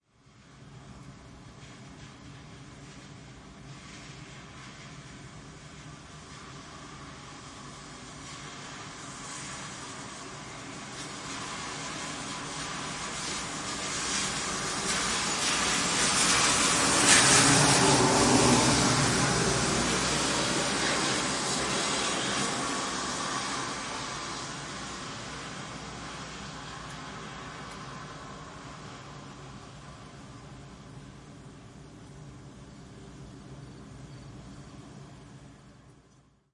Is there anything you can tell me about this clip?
A car passes splashing on the wet road. Clippy XLR EM172 Matched Stereo Pair (FEL Communications Ltd) into Sound Devices Mixpre-3. Recorded at Sanlucar de Brrameda (Cadiz province, S Spain)